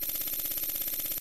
Textsound No2
A short clip of text appearing on screen as used in many action movies like The Bourne Trilogy. This a variation on the first sound like this I uploaded a while ago. There seems to be high demand for these types of sounds for film makers, so I uploaded this one too. You can easily loop it to increase duration. I created this sound myself.
action, appear, appearing, Bourne, CIA, fax, film, info, location, machine, making, movie, screen, sound, Telefon, telex, text